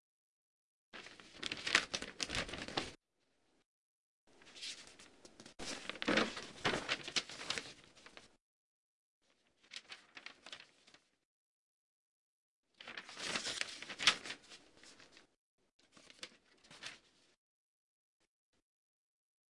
Mono recording of a newspaper rustle
newspaper, recording, rustle